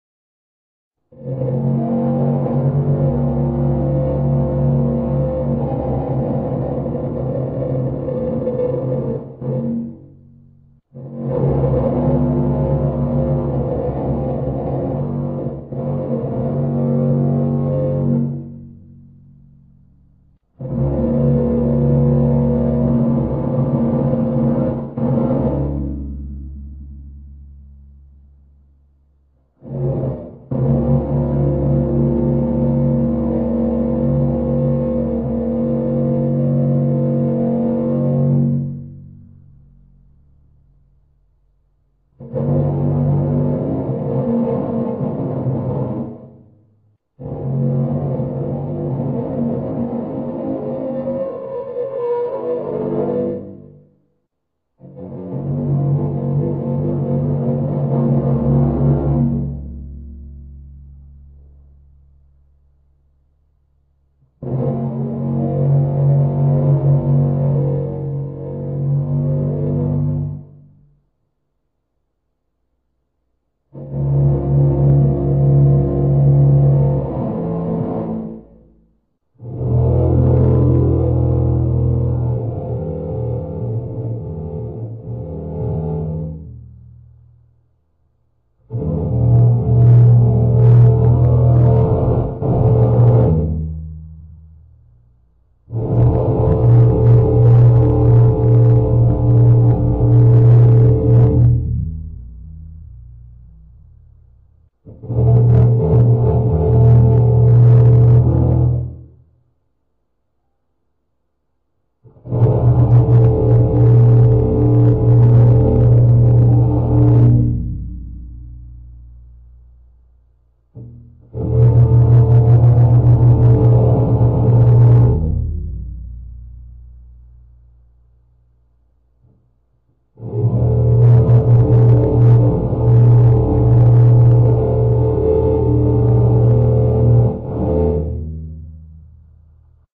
Godzilla Roars
I used my violin to recreate the classic roar of Godzilla from movies.
Godzilla, Monster, Roar